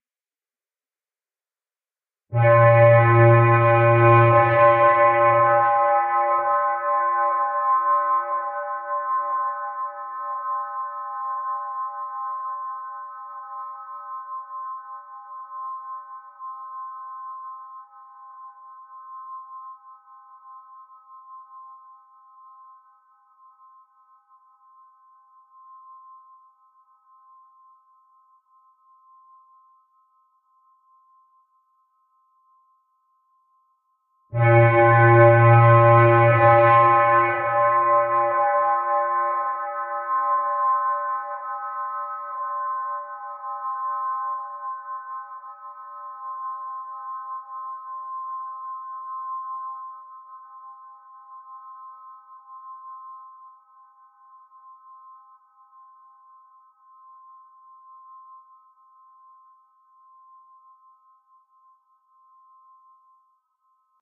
a foggy kind of horn, created with TAL-U-No-62 (Juno 60 sound for free!)
and various effects...uploaded for Michael (TheBadger)
cheers
J